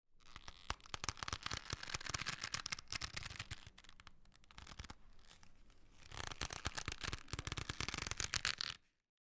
Leather Creak / Stretching
Mono recording of a leather belt being twisted and stretched.
Gear: Zoom H4n
Microphone: Sennheiser MKE600
leather, creaky, squeaking, belt, door, wood, squeak, crack, stretch